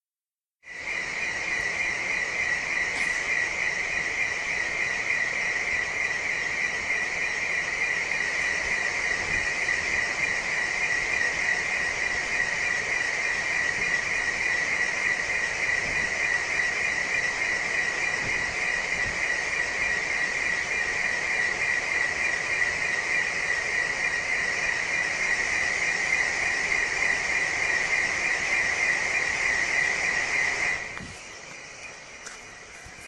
air conditioning ac
outdoor hotel air-conditioning